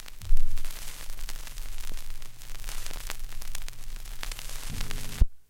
Vinyl Surface Noise Needle Lift 01
Some quiet record noise, then the needle being lifted mechanically from the record.
album, crackle, LP, record, retro, surface-noise, turntable, vintage, vinyl